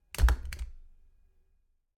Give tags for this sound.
click; clip; cock; gun; hit; slide; staple; stapler; thud; tick

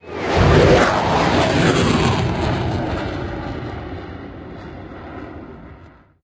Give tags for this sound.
aeroplane; aircraft; airplane; F-16; F16; fighter; flight; flying; jet; military

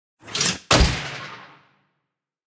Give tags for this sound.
FX; Weapon; Action; Shot

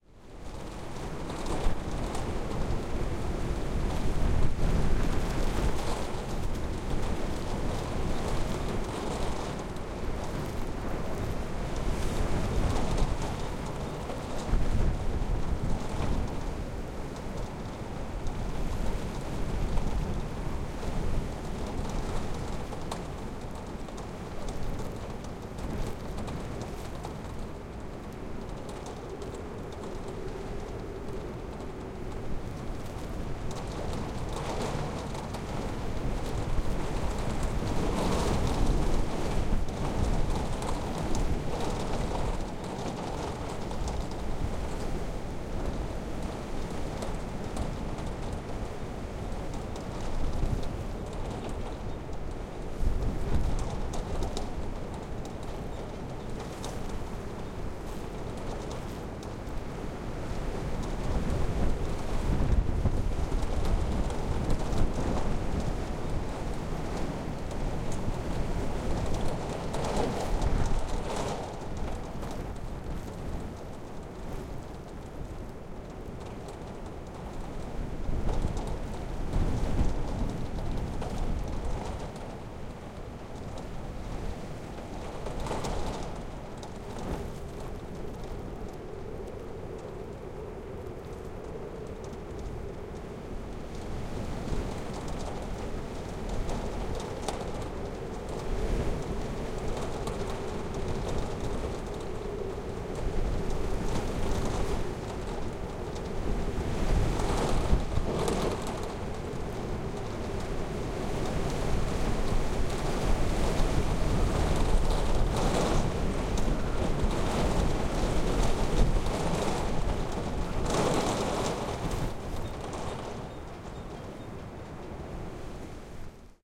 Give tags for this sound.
winter; snow; wind

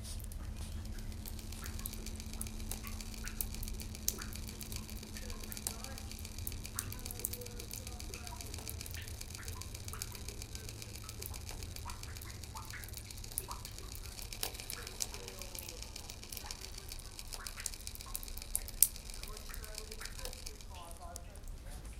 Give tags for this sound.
cambridge; dripping; shelter; station; train; water

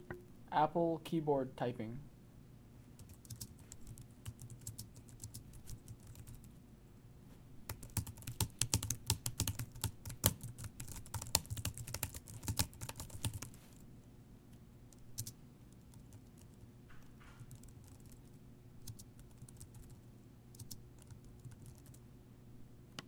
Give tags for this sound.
Click; Tack; Tick; Typing

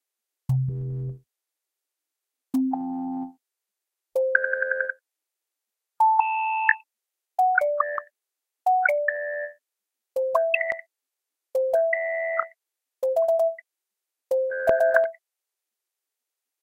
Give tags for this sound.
engine; warning; weird; emergency; starship; futuristic; peep; sci-fi; electronic; signal; fx; alarm; call; digital; space; spaceship; bridge; atmosphere; alien; science; fire; sound-design; future; energy; fiction; alert; hover; noise

